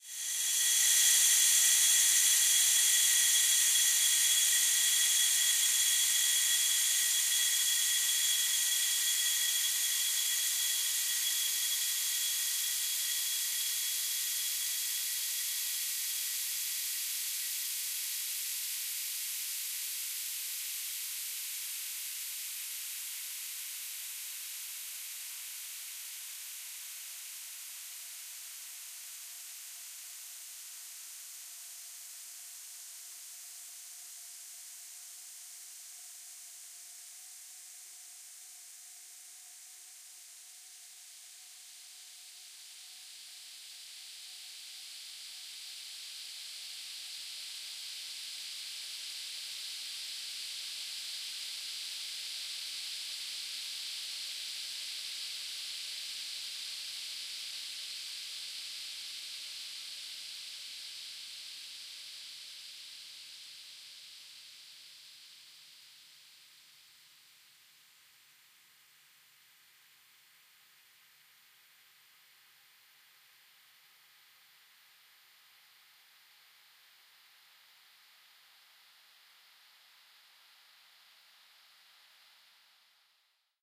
Gas Leak
cinematic, leak, audacity, paulstretch, steam, hiss, pressure, air, ambiance, white-noise, ambience, danger, noise, atmosphere, gas